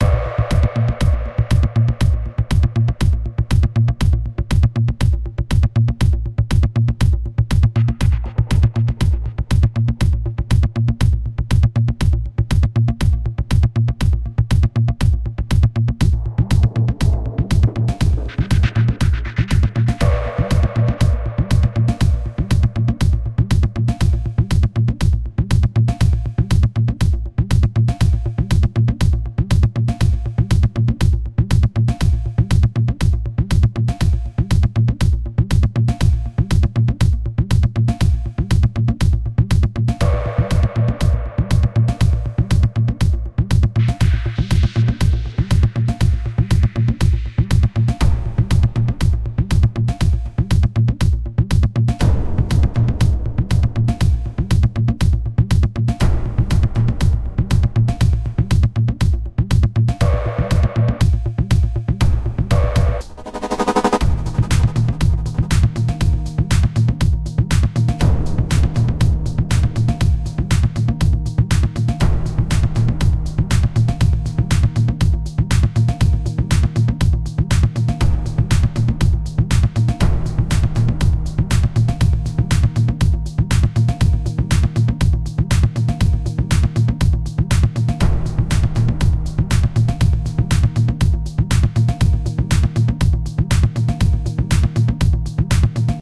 Rolling Techno.
Synths:Ableton live,Kontakt,Reason,Silenth1.
Bass, Beat, Clap, Dance, Drum, Drums, EDM, FX, HiHat, House, Kick, Loop, Minimal, Music, original, Snare, Stab, Techno